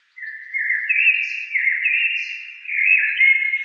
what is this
These are mostly blackbirds, recorded in the backyard of my house. EQed, Denoised and Amplified.
bird, field-recording, nature, processed